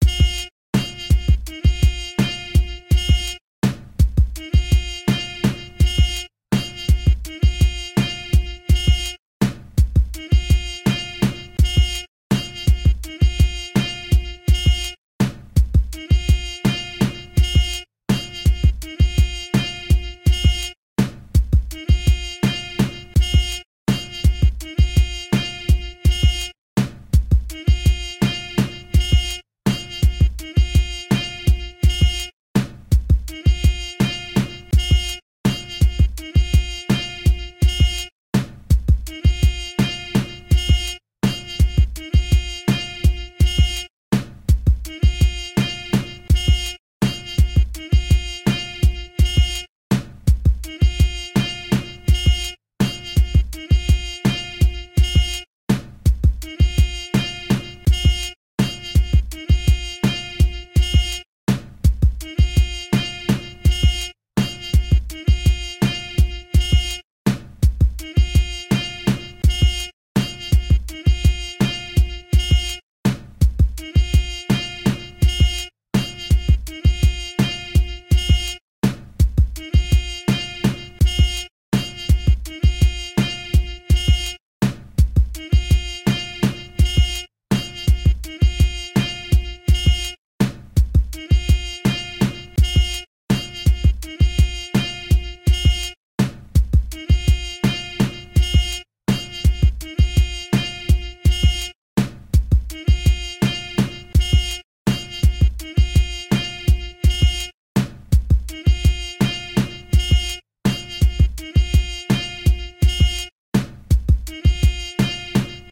Jazzy Hip-Hop Instrumental Beat 2
My second hip hop jazz loop, plz check out my first loop too!
This was solely composed by myself and can be used for any purposes.
beats, Drum, Hop, horn, instrumental, jazz, Loop, Rap